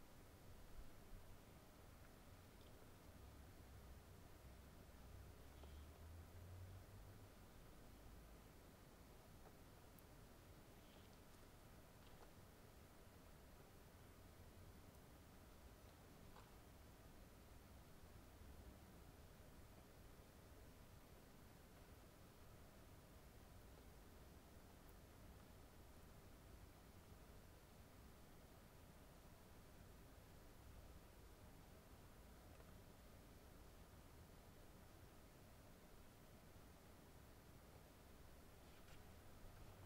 Ambience Room
Athmo, Room